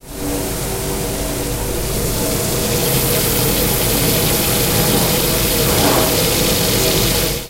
Dishwasher water
Close up on the water of a dishwasher.
sink, UPF-CS14, dishwasher, campus-upf, field-recording, water